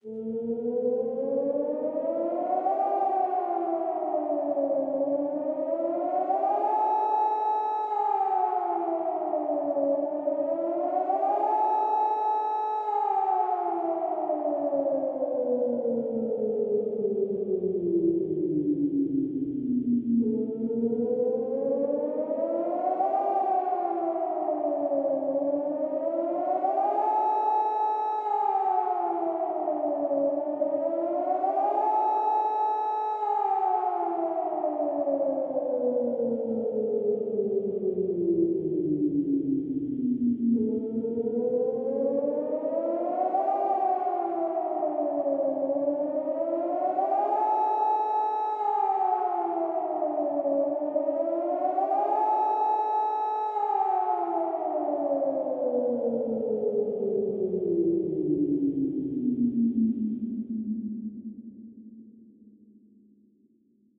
Nuclear alarm of Tihange (Liège, Belgium)
This is an alarm based on what we can hear here in Huy, Ben-Ahin or anywhere near the nuclear power plant of Tihange (Belgium).
I wanted a good quality recording of that but I didn't found it so I tried to remake that.
I will try to record that alarm next time it's the test.
with some effects like Convolution Reverb of Max For Live or Frequency Shifter.
I made that with Ableton Live 10.